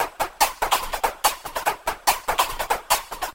140 BPM
Hardbass
Hardstyle